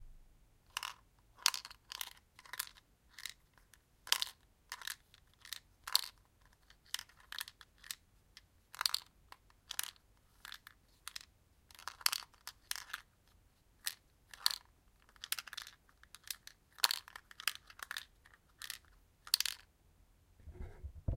Tic Tac sound slow
Playing with the last tic tac from the box.